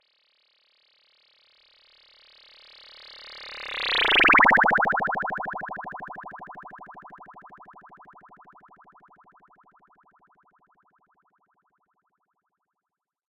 Weird Spaceship
A weird little Spaceship fly by I made using a tone sweep and Doppler shift. enjoy :)